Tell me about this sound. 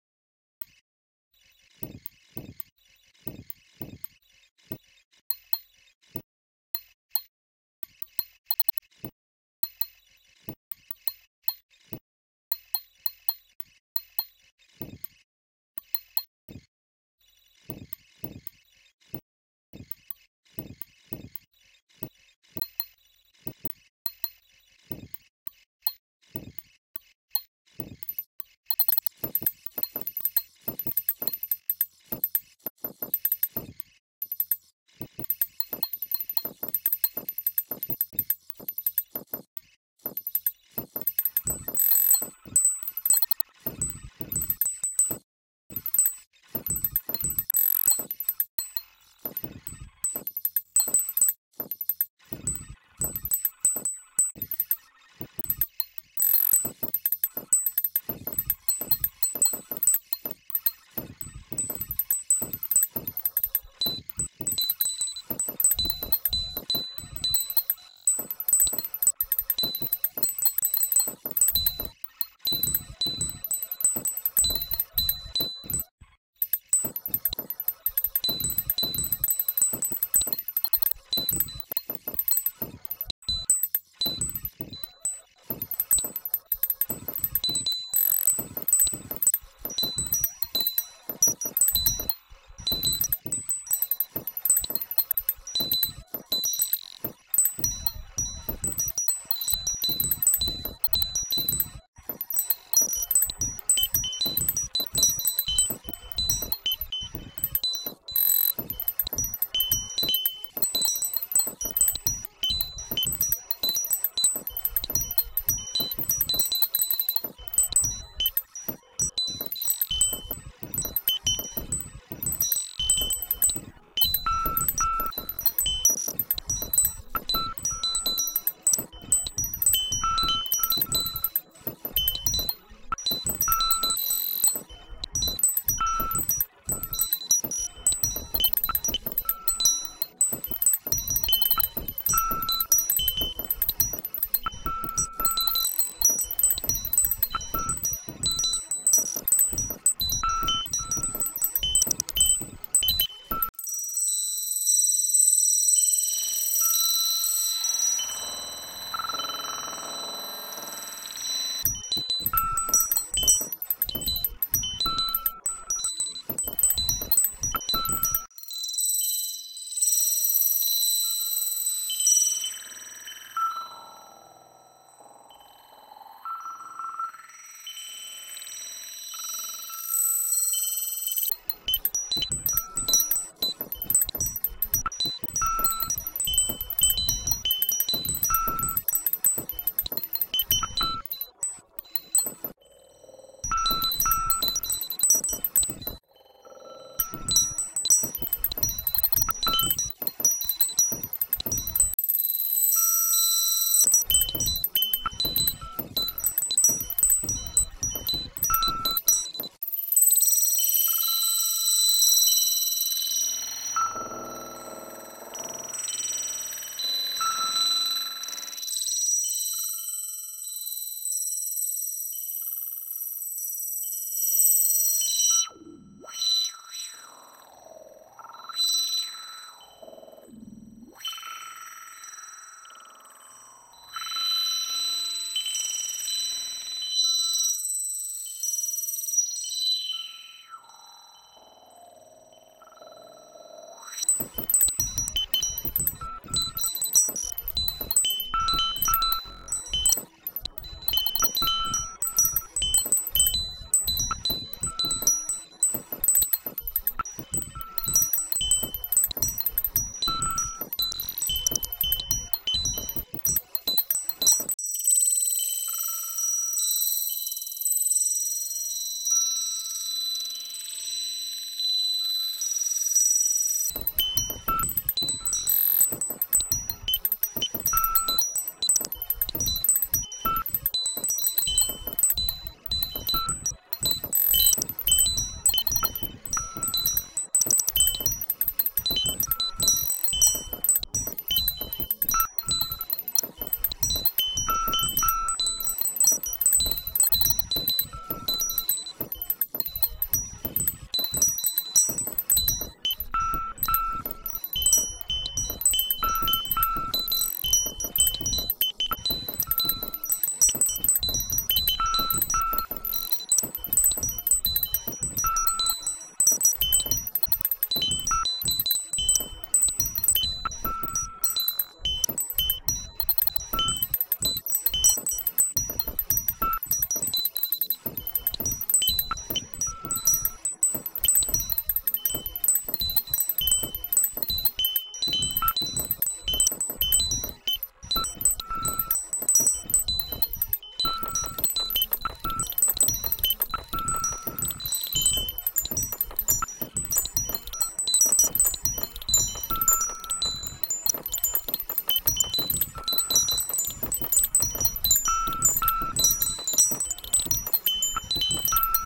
I recorded 4 Gongs, then extracted 4 beats in a sample of each and processed it with the BBCut-Library in SuperCollider